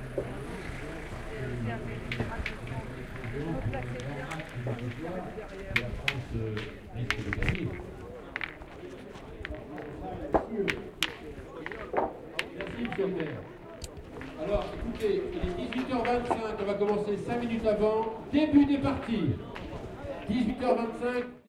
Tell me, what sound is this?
The sound of competitive boules during the French National Championships 2007. Includes the sounds of boules hitting each other and the backboard as well as the announcer.